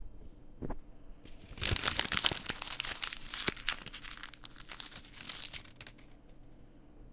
Putting things in a paper
crumple, fold, paper, rustle